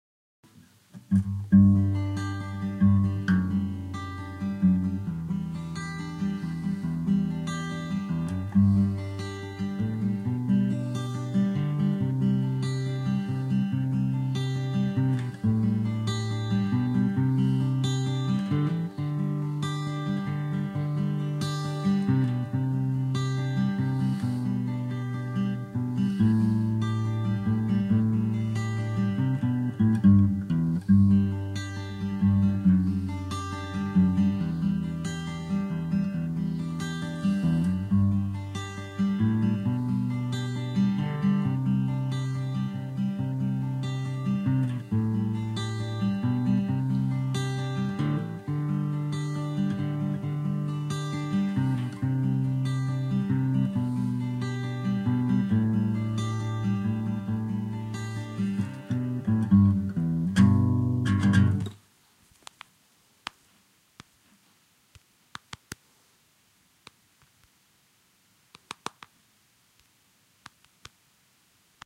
sad melody (guitar)
guitar, melody, sad, sadness